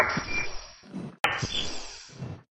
Philip is a medium-sized robot with a melodic noise to his mechanical works. You can hear the crunch of his feet making two steps and the sound of air whooshing through his pneumatic parts. Also suitable as a repeating loop for factory machinery. The sound effect is comprised of original recordings of breaths and banging on different metal surfaces.